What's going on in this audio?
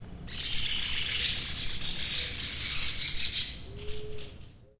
Birds sound. Recorded at home backyard. Cordoba, Argentina
ZoomH4, midgain